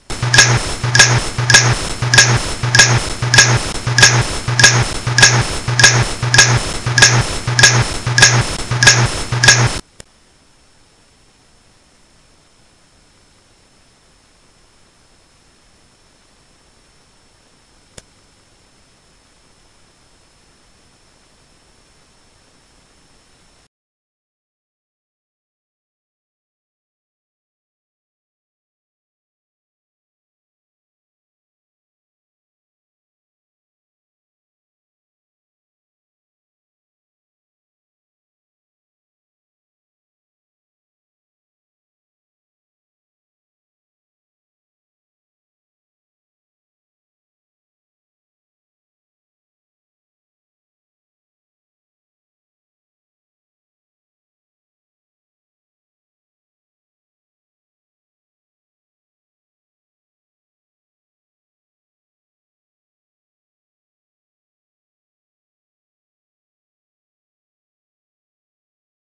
factory machine
sound from a switch blade opening and closing looped and pitch adjusted
effects, funny, games, sfx, sound